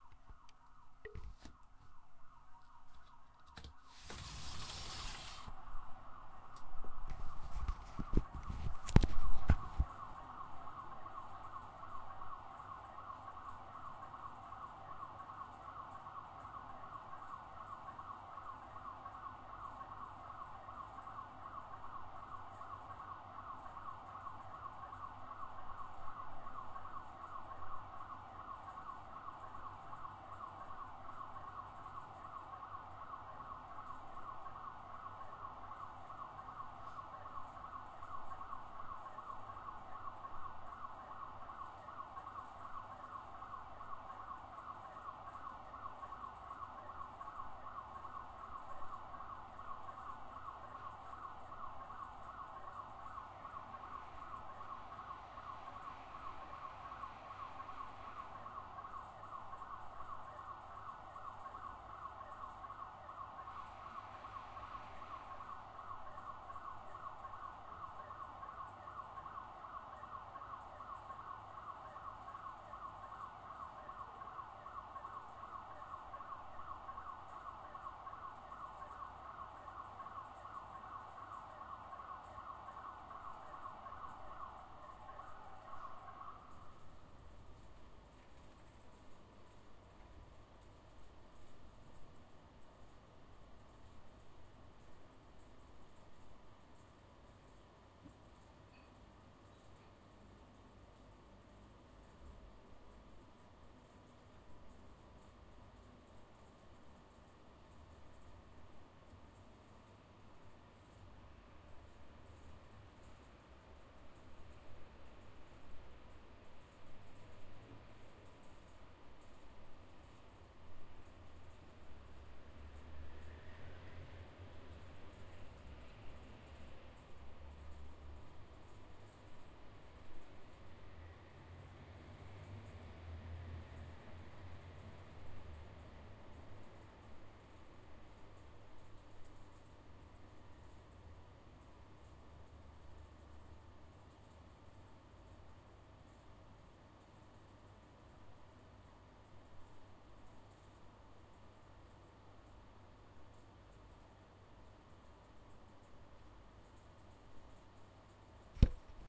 City night alarm in the back
This alarm is often on for a few minutes at night.
It's distant from the mic. Was recorded using Tascam DR-40s mic